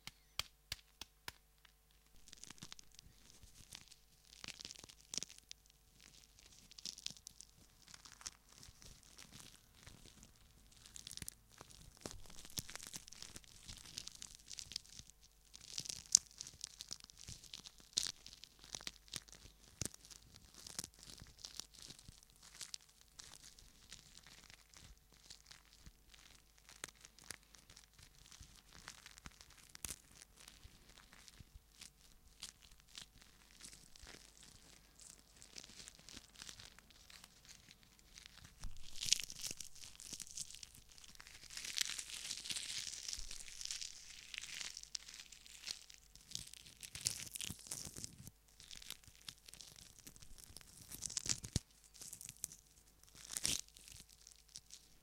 Crackly Egg Membrane Hatching Foley
Crackly, moist sound of a hard-boiled egg with disintegrating shell and intact membrane. Could be used for an animal hatching or generic organic sounds.
~ Popeye's really strong hands.
egg, membrane, hatching